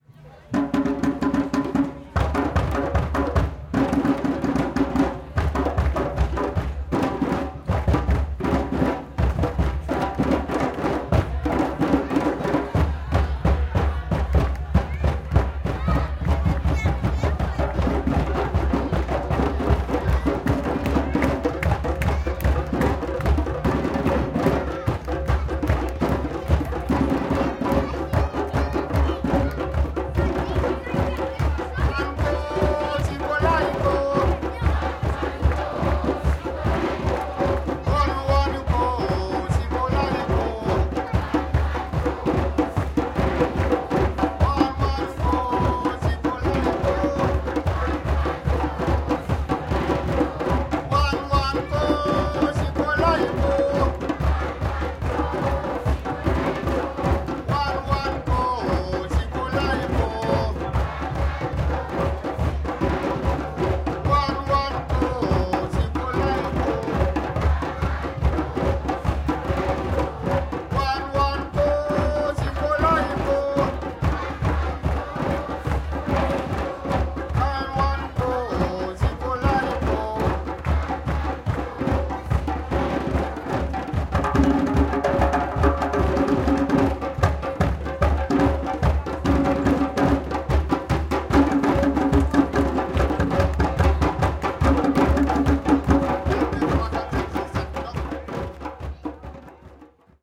African singer and drummers playing with audience in the street.
This audio file is one of the recordings I made in the streets of Nanterre (suburb of Paris, France), during the “fête de la musique 2022”, which is an outdoor music festival held in our country every year on June 21st.
Here, one can hear some African drummers playing while their leader is singing a traditional style song, making the audience repeat his lyrics. In the background, one can also hear voices from people watching the performance.
Thanks to the ‘’Ensemble de percussions africaines du Conservatoire de Musique de Nanterre’’ for giving me the opportunity to record this file.
Recorded in June 2022 with an Olympus LS-P4 (internal microphones, TRESMIC system on).
Fade in/out and high pass filter at 140Hz -6dB/oct applied in audacity.